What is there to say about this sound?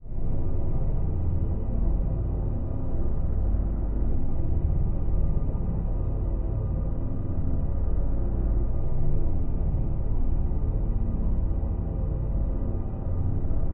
Viral Terra Sweep
cavernous, dark, gloomy